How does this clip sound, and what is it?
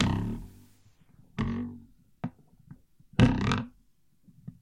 Mono ForkBend
The goofy sound of a fork being flicked and resonating on the edge side of a table
bend, cutlery, fork, metal, percussion